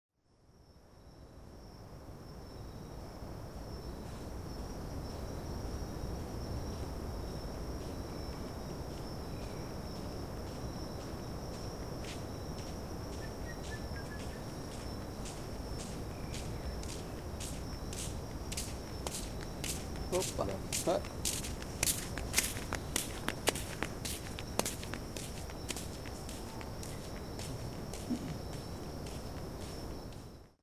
FR.PB.footsteps
NightAmbience at PraiaBranca, Brazil. Sea-waves, voices and wind as background, several kinds of insects making their performance, and Kim walking by, whistling, adding this rare sound make by his "flip-Flops".